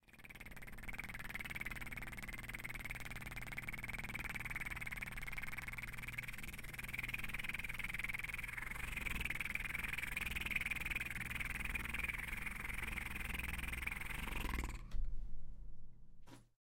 a tractor made by my own mouth